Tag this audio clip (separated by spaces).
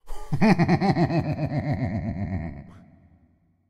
epic fantasy fear frightening frightful game gamedev gamedeveloping games gaming horror indiedev indiegamedev laugh laughter male rpg scary sfx terrifying video-game videogames